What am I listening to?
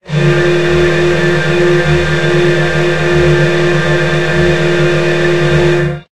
granny ahhh3
Created with Granulab from a vocal sound. Less pusling added random panning. More high end timbre.
synthesis, granular, noise, stereo